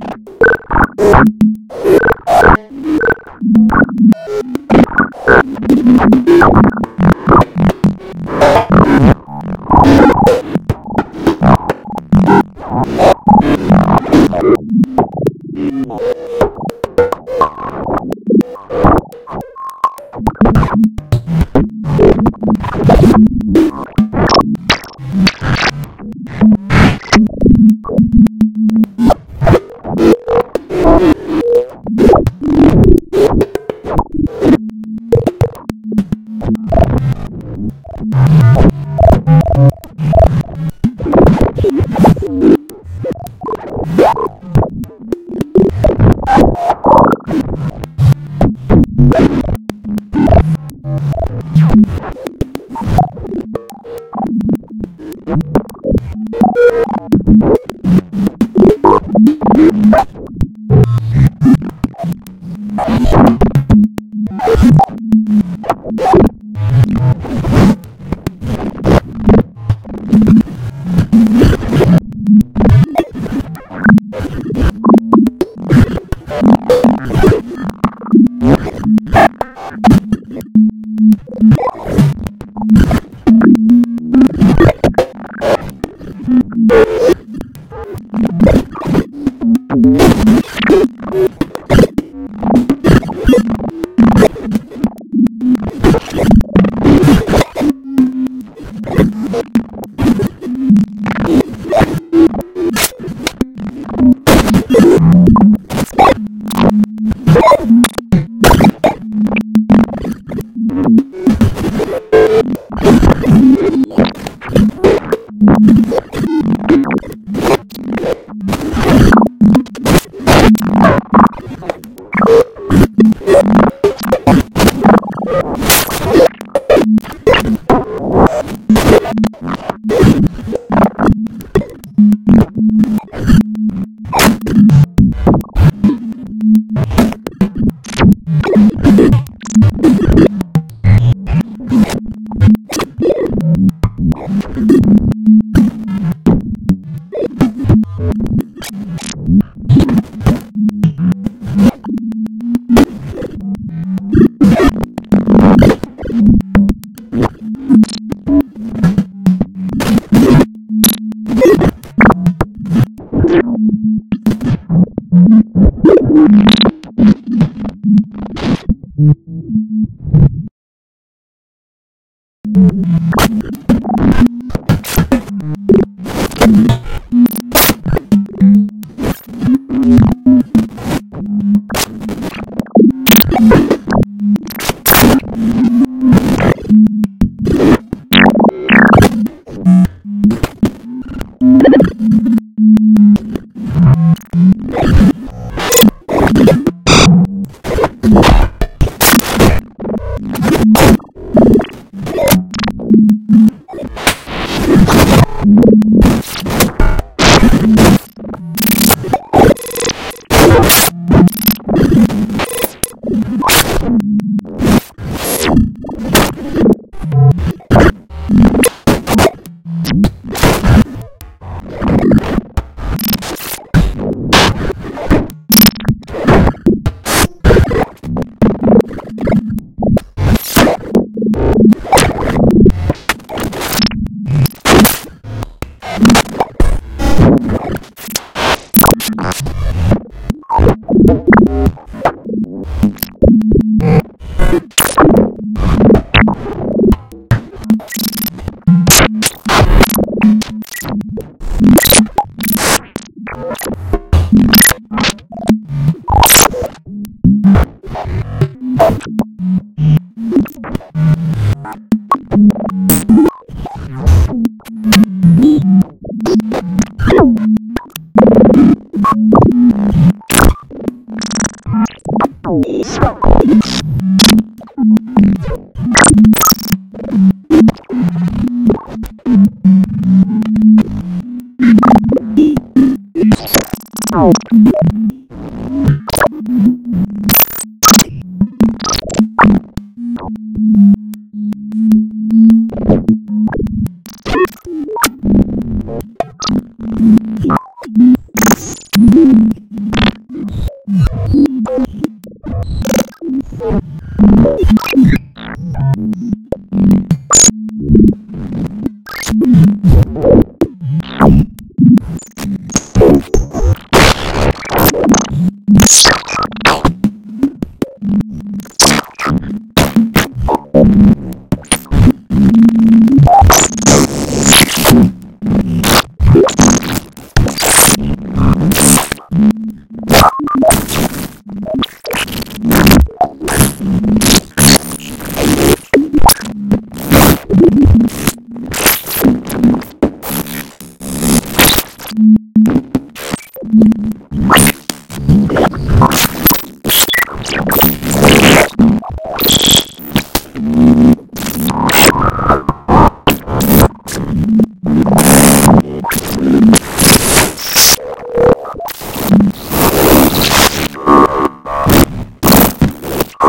blok random tones/textures
BLOK modular set to bang on random keys/throw random parameters in various places. Sort of a kind of FM synthesis but I'm pretty sure BLOK can do a lot of extra things like place filters/waveshapers after the modulator but before the main oscillator. Don't want to give away much else but you can improv random textures by drawing in a waveshaper window/right-click to drag the drawing from one side to another. Also you can use the atan button to scale the notes on some sort of curve by distorting the note values. I could've added delay/reverb/EQ/ ambience but I wanted it to be as dry as possible because that's personally what I look for, raw sounds that you can choose to change if you like
pitch-shift, distortion, modular, filters, electronic, FM, digital